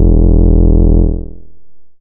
Basic pulse wave 3 E1
This sample is part of the "Basic pulse wave 3" sample pack. It is a
multisample to import into your favorite sampler. It is a basic pulse
waveform with a little LFO
on the pitch. There is quite some low pass filtering on the sound, so
the high frequencies are not very prominent. In the sample pack there
are 16 samples evenly spread across 5 octaves (C1 till C6). The note in
the sample name (C, E or G#) does indicate the pitch of the sound. The
sound was created with a Theremin emulation ensemble from the user
library of Reaktor. After that normalizing and fades were applied within Cubase SX.
basic-waveform, multisample, pulse, reaktor